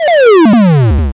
Hit Hurt39
bit, 8, game, SFX, sample